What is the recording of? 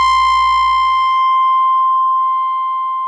piano type sound but obviously fake and a bit shrill...